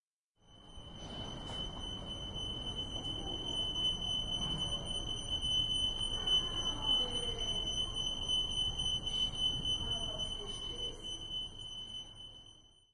A fire alarm of small shops near the harbor of genova recorded while walking by them.
alarm, alarming, alert, doppler, effect, fire, genova